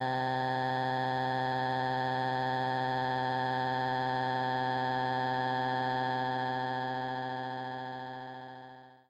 Long Uh
"uh," sound. manipulated
voice, word, vocal, speech, stretched, ease, male